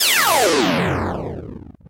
power down
Made with the DirectWave plugin in FL Studio 20, Recorded in FL Studio, Processed in Audacity.